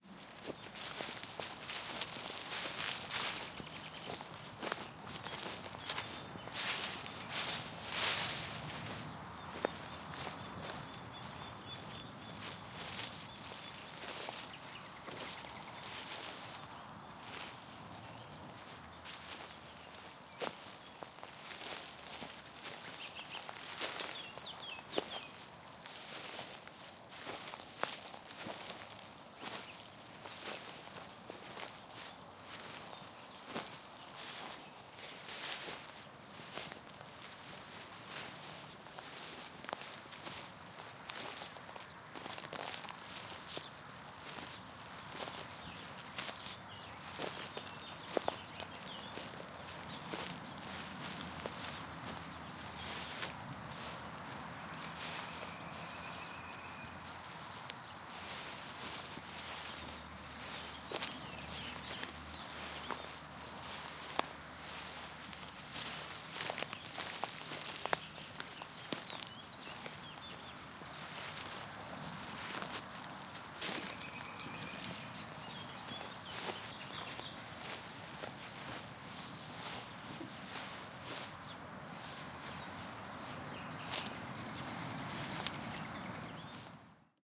Footsteps on grass 2
Footsteps on grass recorded with Zoom H4
ambient, ambience, recording, soundscape, field, atmophere